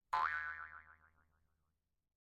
Cartoon Boing
A boing sound made with a Jaws harp. Recorded with a behringer C2 pencil condenser into an m-audio projectmix i/o interface. Ver little processing, just topped and tailed.
cartoon, field, boing, recording